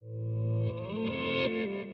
Axiomatical One Shot
This is from a collection of my guitar riffs that I processed with a vinyl simulator.This was part of a loop library I composed for Acid but they were bought out by Sony-leaving the project on the shelf.
electric-guitar, guitar, processed-guitar, riff, vinyl